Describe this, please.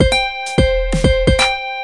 Simple Synth Beat
A simple and short beat loop made with a synth plugin and some drums.
groovy, beat, game, videogame, notification, synth, loop, music, percussion-loop, drum-loop, video-game, drums, ringtone, vgm, jingle, sample